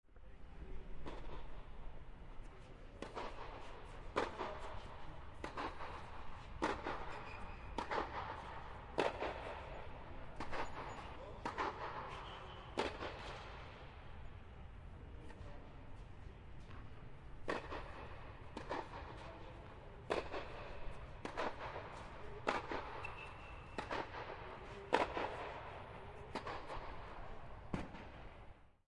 tennis-in-dome-far
Recording of tennis in indoor dome with 2 players, stereo
playing, close, tennis, bouncing, long, feet, bounce, racket, ball, squeaking, dome